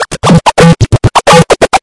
FLoWerS 130bpm Oddity Loop 019
Very strange rubbery sounds. Made in ts404. Only minor editing in Audacity (ie. normalize, remove noise, compress).
loop, experimental, electro, resonance